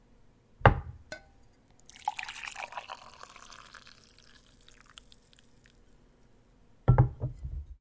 Sound of tea being poured and the pot being set down